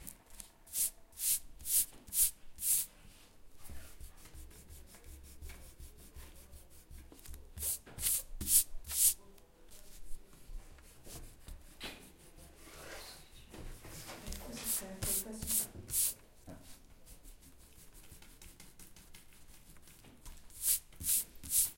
prise de son de feuille frotte